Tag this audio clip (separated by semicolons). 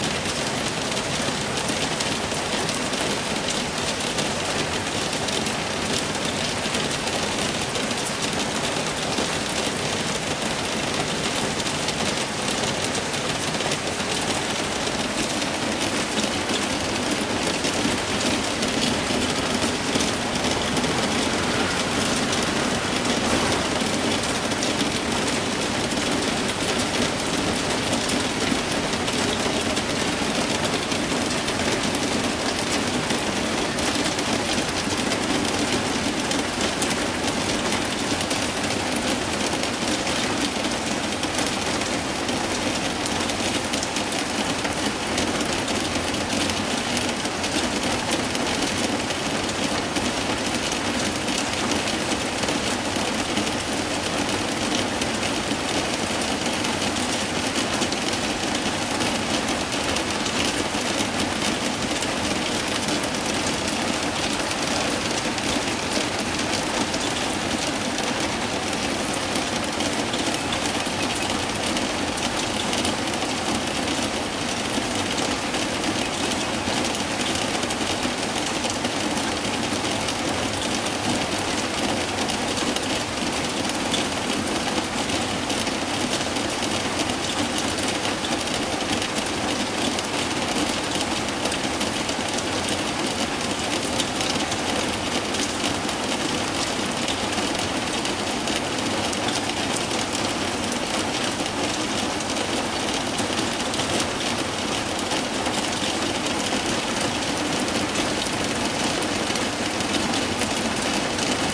shower; rain; hit-on-roofs